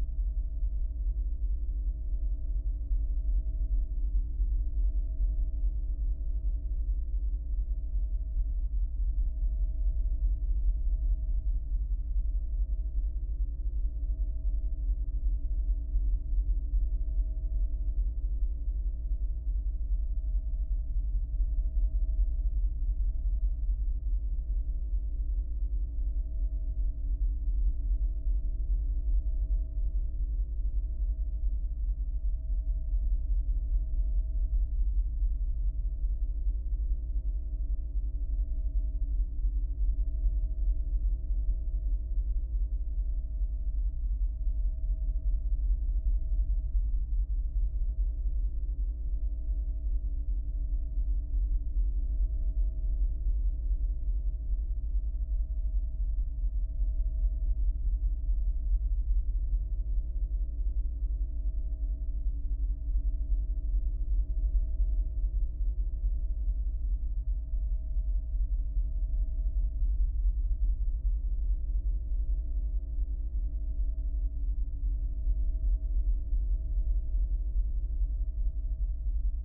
21. Basement Machinery (roomtone)
The roomtone of a machine room, a server room, a pilot cabin, etc.
This sound is part of the Weird Roomtones soundpack - a compilation of synthetic ambiences and silences meant to enhance a neutral atmosphere in the desired direction. The filenames usually describe an imaginary situation that I imagine would need the particular roomtone, often influenced by movies I've watched.
>>>>> You can use the soundpack as you wish, but I'd be happy to hear your feedback. In particular - how did you use the sound (for example, what kind of scene) and what can be improved.
Thank you in advance!
atmos, roomtones